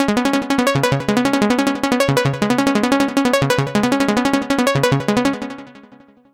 23 ca dnb layers
These are 175 bpm synth layers background music could be brought forward in your mix and used as a synth lead could be used with drum and bass.
background, bass, club, dance, drop, drum, dub-step, edm, effect, electro, electronic, fx, glitch-hop, house, layer, layers, lead, loop, multi, rave, sample, samples, sound, synth, tech, techno, trance